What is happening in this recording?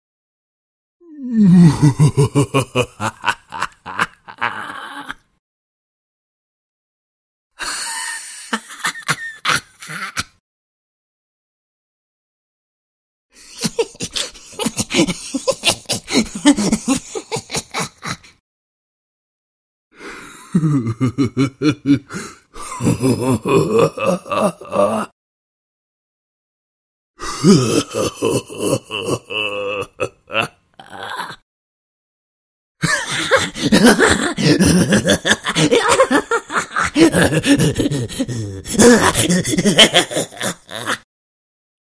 This series of evil laughs ranges from mad scientist to evil thug.